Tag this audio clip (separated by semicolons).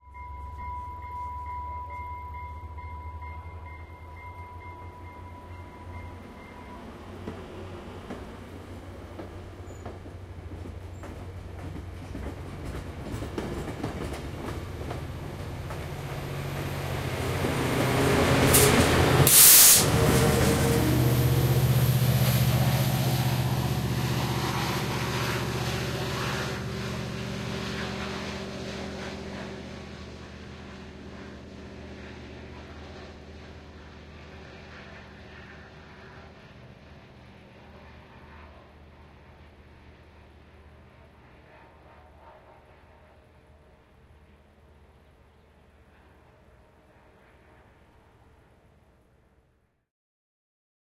bell; depart; stereo; train